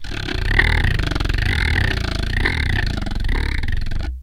growl.oeoeoe.09
wood, friction, instrument, idiophone, daxophone